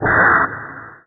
This is sort of a noisy sound found on one of my old tapes so I am not exactly sure of the source.